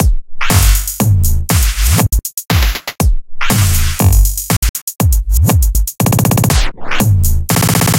Glitch Loop
bpm, dubstep, glitch, house, snare